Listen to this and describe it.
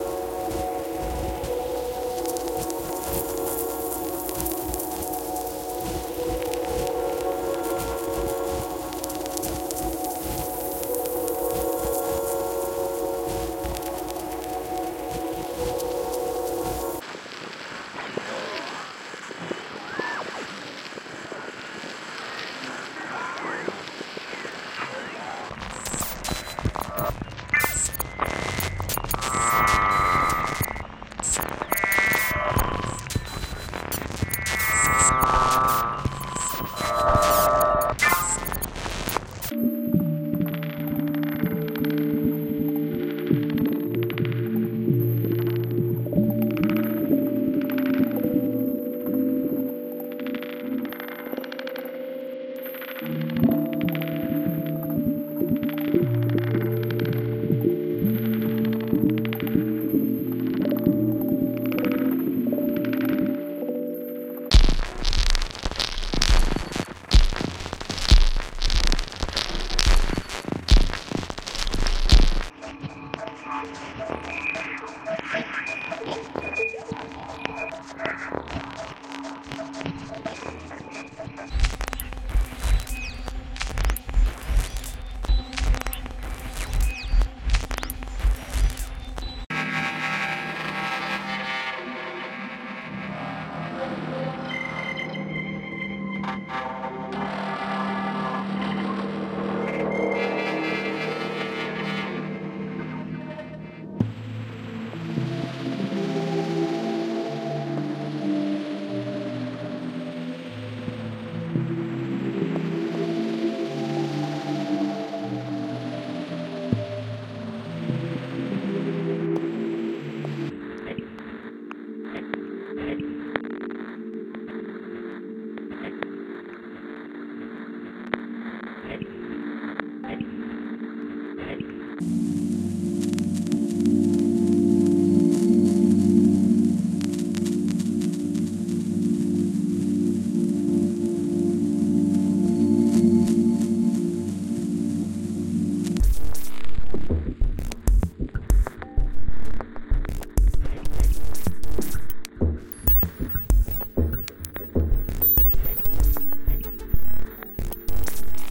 MakeNoise-Morphagene-MotherMisty-AmbientReel
Ambient Reel for MakeNoise Morphagene